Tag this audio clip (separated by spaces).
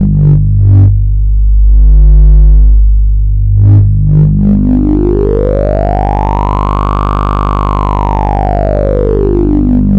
sound robot